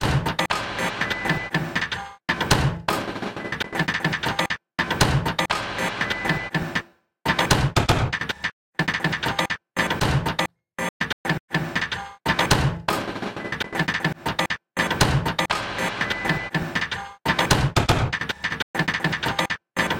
I recorded my printer with my iPhone and the Mikey from Blue Microphones. Then I edited, gated and processed the hell out of it.
120bpm, iphone, loop, mechanical, mikey, printer, rhythm, tenfour